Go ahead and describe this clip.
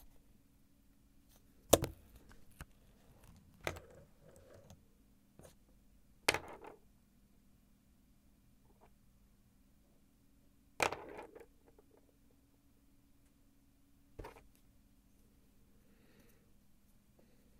Pen falls. Recorded with a Neumann KMi 84 and a Fostex FR2.
falling, pen, pencil